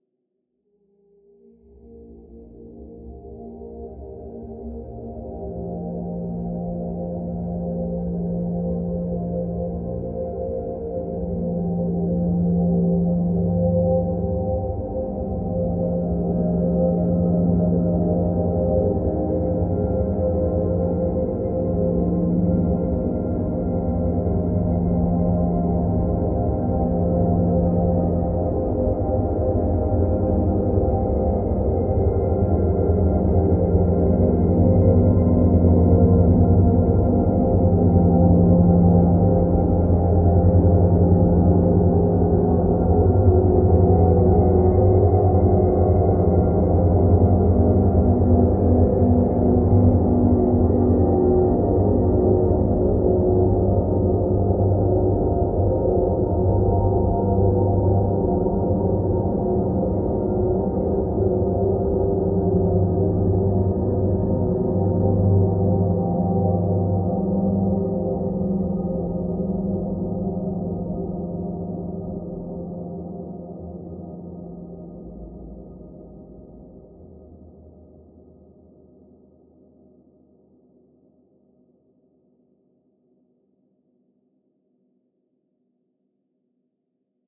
LAYERS 010 - Dreamdrone is an extensive multisample package containing 108 samples. The numbers are equivalent to chromatic key assignment. The sound of Dreamdrone is already in the name: a long (over 90 seconds!) slowly evolving dreamy ambient drone pad with a lot of movement suitable for lovely background atmospheres that can be played as a PAD sound in your favourite sampler. Think Steve Roach or Vidna Obmana and you know what this multisample sounds like. It was created using NI Kontakt 4 within Cubase 5 and a lot of convolution (Voxengo's Pristine Space is my favourite) as well as some reverb from u-he: Uhbik-A. To maximise the sound excellent mastering plugins were used from Roger Nichols: Finis & D4.